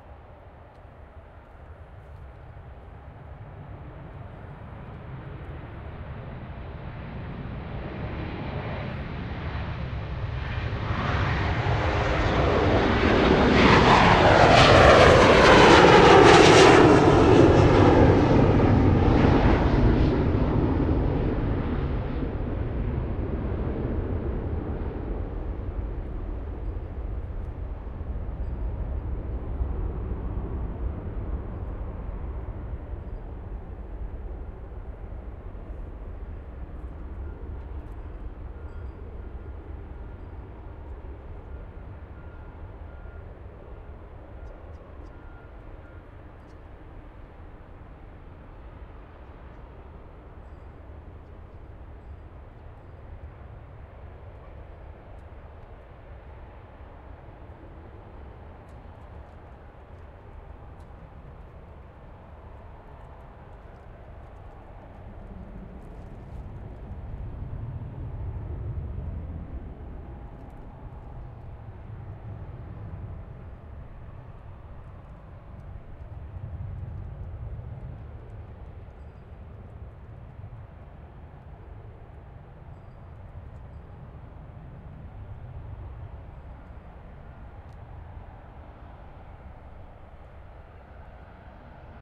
Passenger jet departs 2
Recorded 250 meters from the runway at 90 degrees to the direction of the planes.
take-off, roar, aircraft, engines, airport, takeoff, noise, plane, launch, transport, airplane, rumble, engine, runway, jet, areroplane